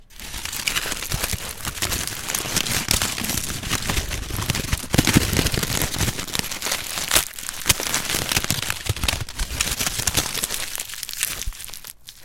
Aluminum foil being moved around, squished, and bent
Crinkling, Aluminum, Foil